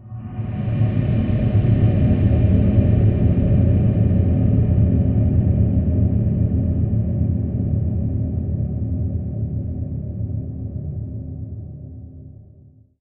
Dark ethereal atmosphere. Ideal for a documentary on alien culture. This sample was created using the Reaktor ensemble Metaphysical Function from Native Instruments. It was further edited (fades, transposed, pitch bended, ...) within Cubase SX and processed using two reverb VST effects: a convolution reverb (the freeware SIR) with impulses from Spirit Canyon Audio and a conventional digital reverb from my TC Electronic Powercore Firewire (MegaVerb). At last the sample was normalised.
drone, deep-space, ambient, long-reverb-tail